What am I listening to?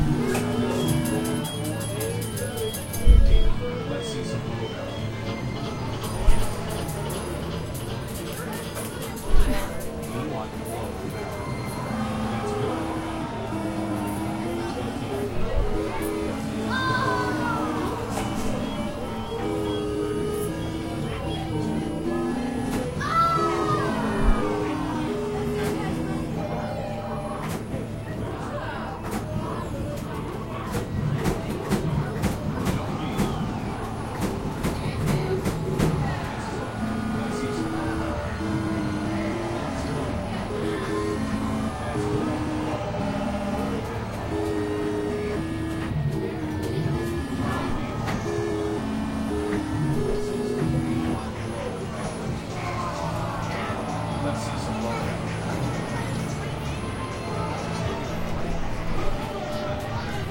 Sounds of an arcade on the boardwalk in Cape May, New Jersey.
video-games, slot-machines, videogames, h4n, arcade, slots, games